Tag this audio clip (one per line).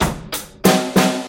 Loop
Livedrums
kick
snare